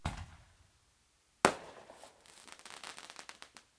Single shot bottle rocket with crackling sparkly thing recorded with laptop and USB microphone.